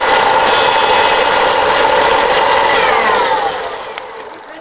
Vacuum Low
This is a small dirt devil vacuum set to low.
vacuum, dirt, devil, low